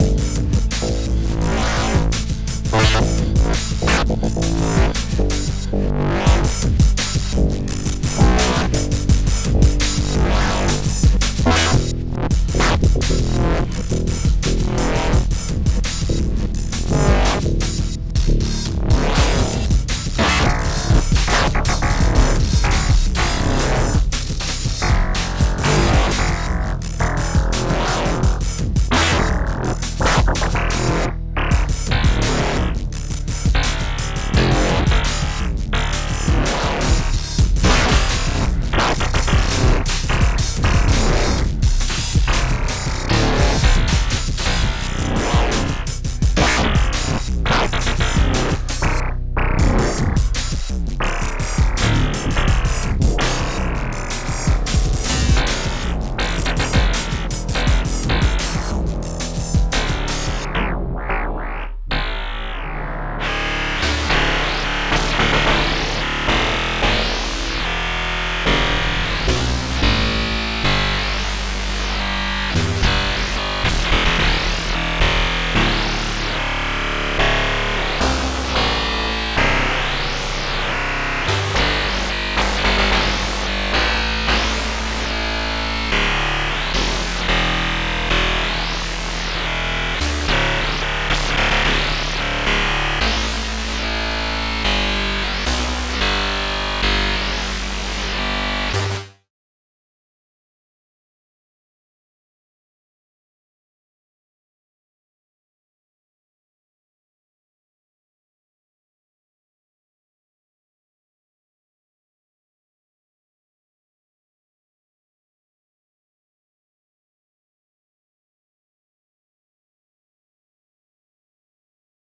This is art and sound with some creepy and weird feels.
Ritual